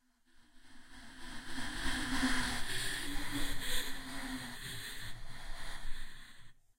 Female Ghost Crying
Me crying, messed with in audacity. If you want, you can post a link of the work using the sound. Thank you.
cries, cry, female, girl, sad, speak, vocal